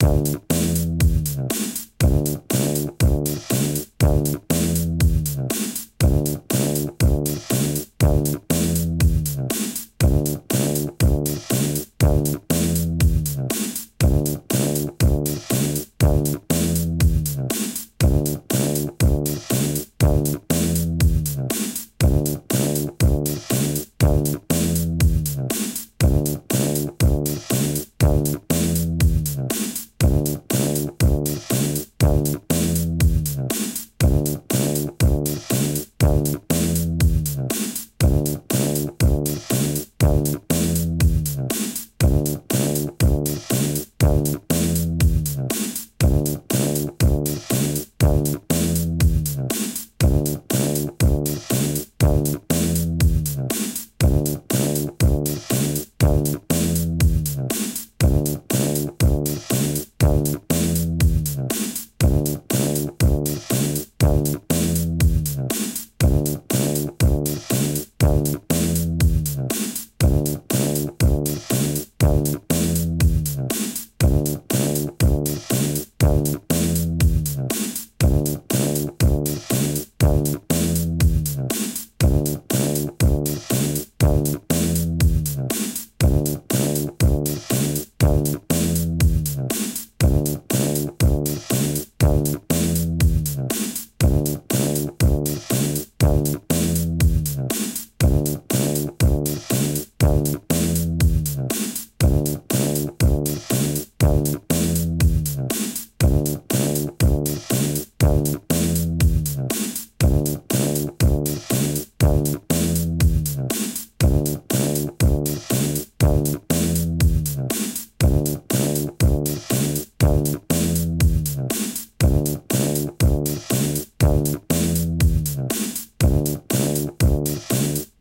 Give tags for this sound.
120; 120bpm; beat; dance; drum; drums; loops

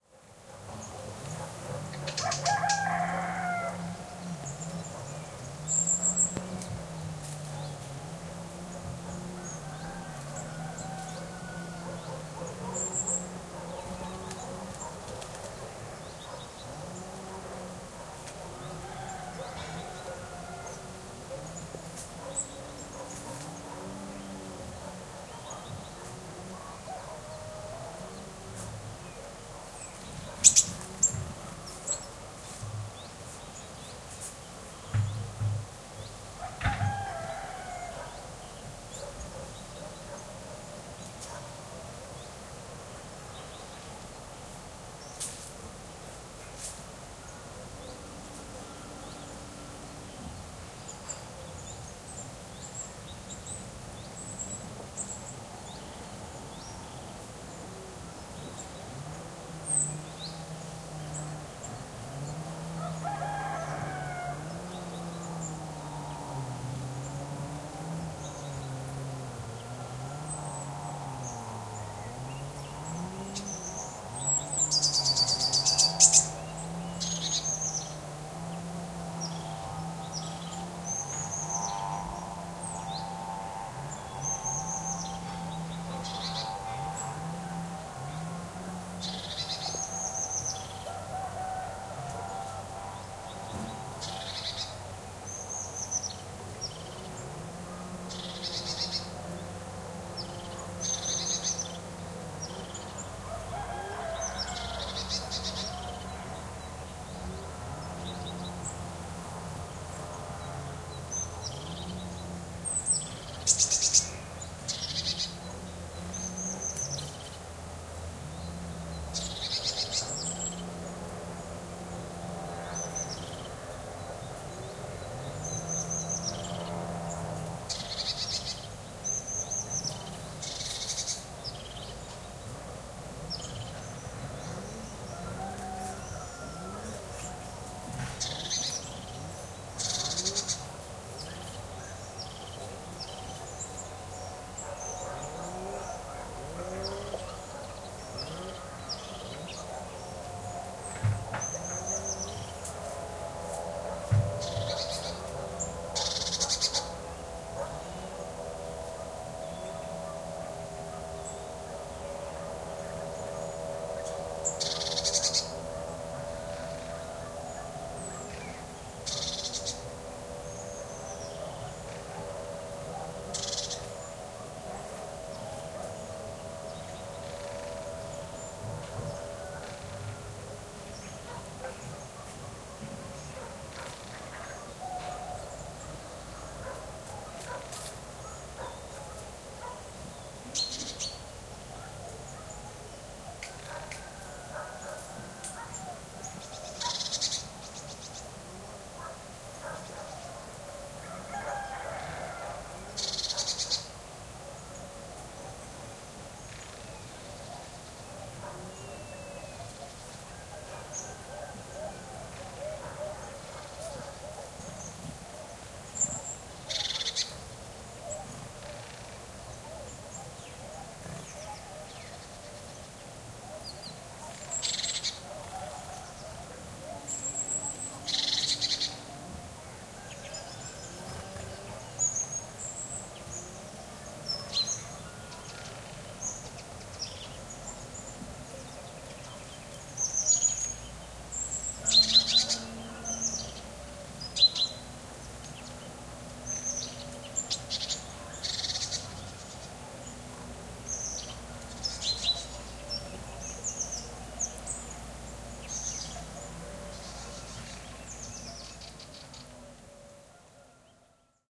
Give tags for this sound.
ambiance; birds; countryside; field-recording; nature; robin; rural; village; warbler

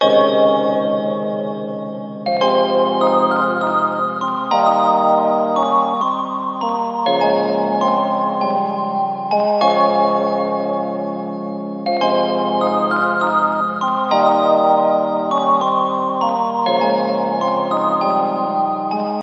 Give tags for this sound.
melody; organ; gentle; sweet; tune; ringtone; high; easy; dreamy; happy; 100-bpm; bells; playful; cheerful

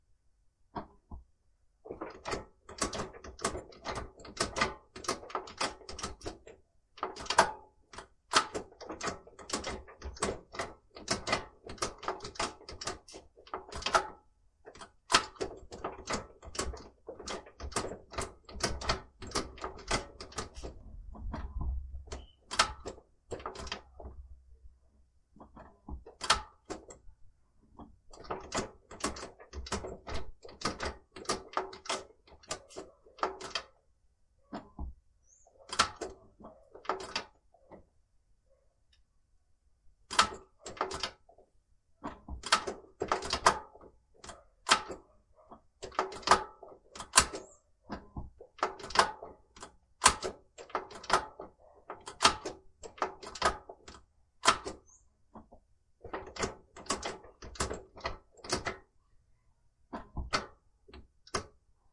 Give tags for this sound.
car; gearbox

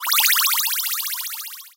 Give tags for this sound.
8bit; animation; arcade; cartoon; film; game; games; magic; movie; nintendo; retro; video; video-game

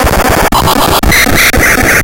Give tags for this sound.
ring-tone; ringtone; sonnerie

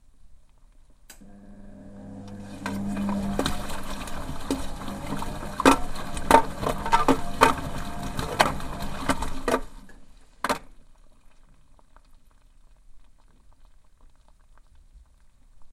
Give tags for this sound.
ambient; hits; water

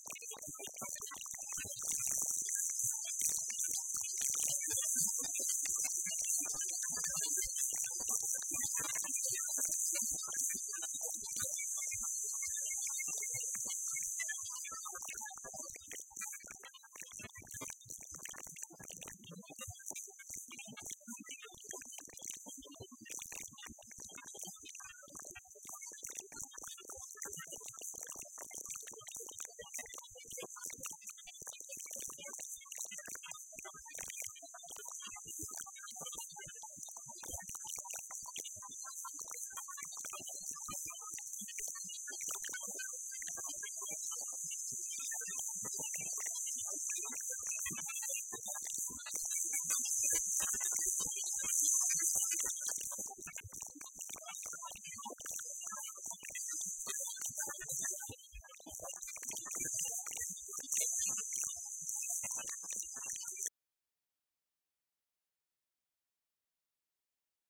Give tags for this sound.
effect; Theremin; Radio

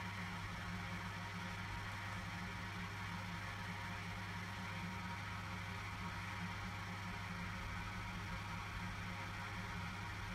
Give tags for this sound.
Foley; Recording; Vent

